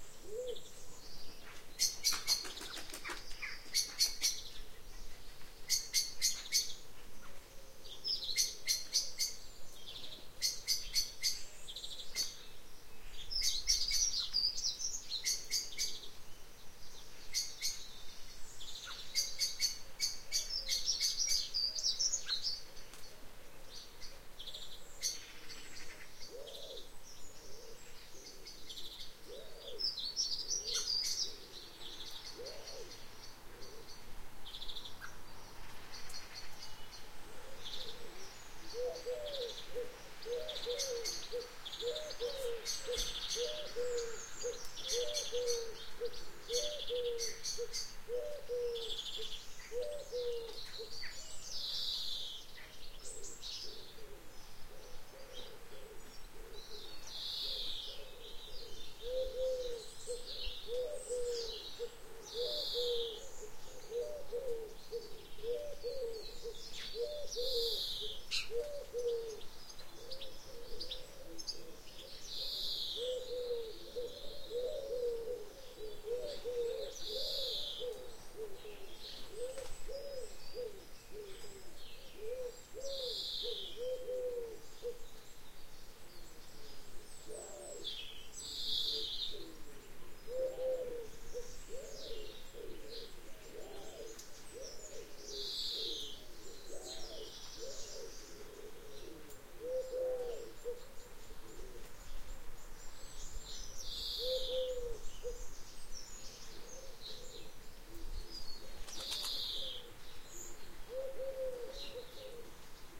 MS Rural UK Garden
Flat and un-fiddled with mid side recording on a Sunday afternoon in a rural English garden. Featuring an angry blackbird, one annoying car and an asthmatic collared dove who makes an appearance at -00:26. Just for fun.
field-recording, Garden, Mid-Side